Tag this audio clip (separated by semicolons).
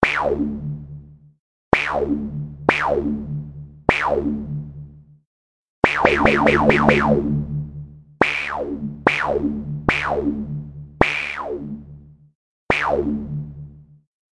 electricity; ray-gun; sci-fi; zap; zapping